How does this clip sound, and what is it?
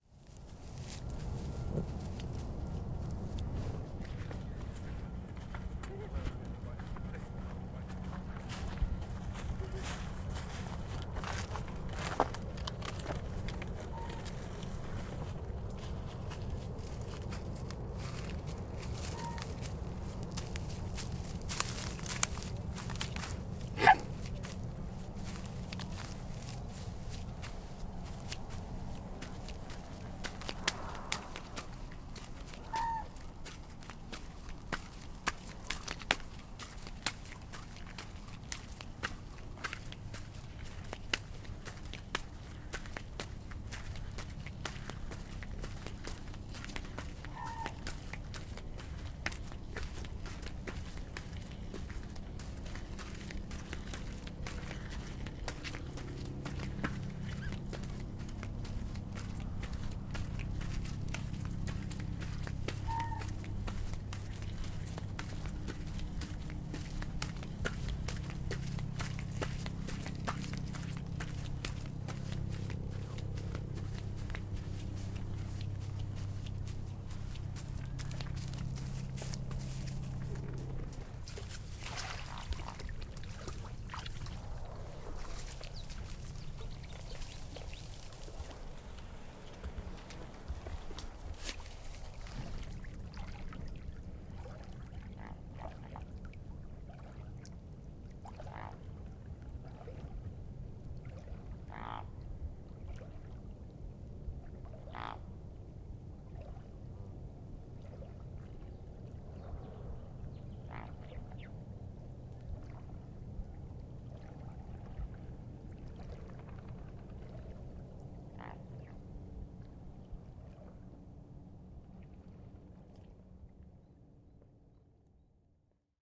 Swan Waddle Gravel to Grass to Swim
The swans were fairly active again at the Newport Wetlands. Unfortunately the air traffic was ridiculous. Nice to get up close to a swan making noises though.
animal, birds, field-recording, locationrecording, nature, swan